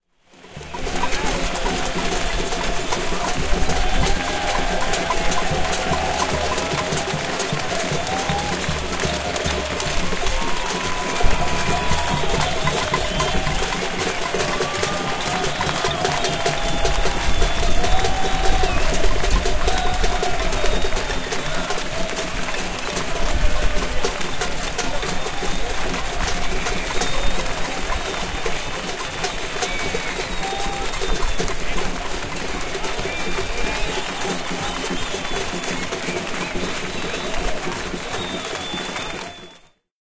people demonstrating in a political protest, big group of people creating noise by banging pots, pans, and other utensils by some eventual whistling and voices
mass-group, steet-riot